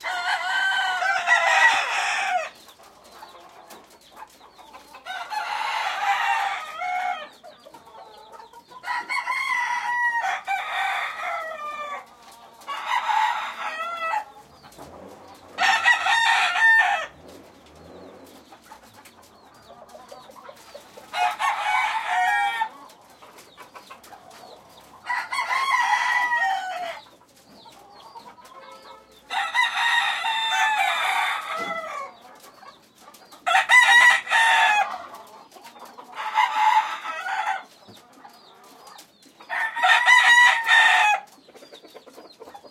chickens in coop
chickens, clucking, coop